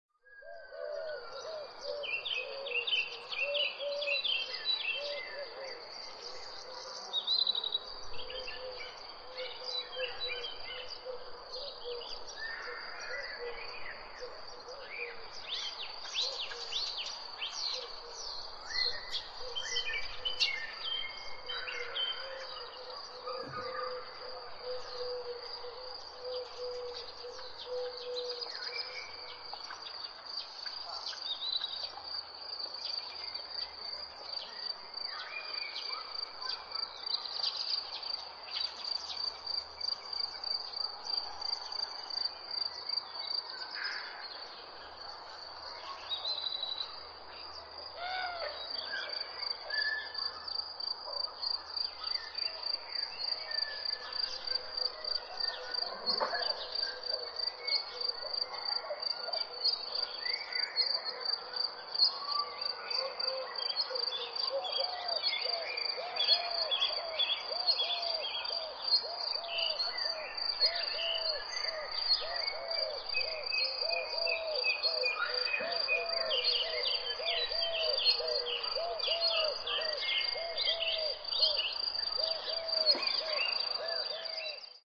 Relaxing-garden-sounds
relaxed, relax, garden